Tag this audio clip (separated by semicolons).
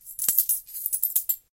cash; coin; coins; money; pay